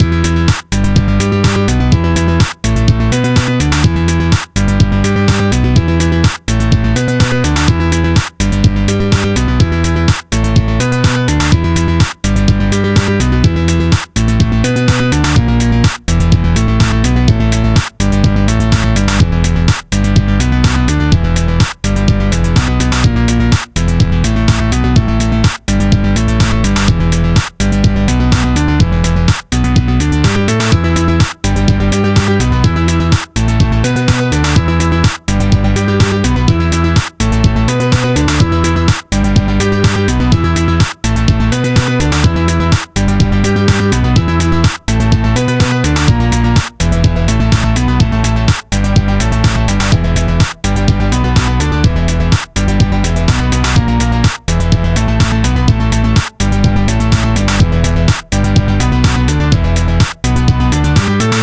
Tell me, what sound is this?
ElectroHouse Cheesy
Electrohouse track. Bit cheesy.
cheesy, bassline, electro-house, electro, bass, electrohouse, house, loop, electronic, synthline, beat, synth, drums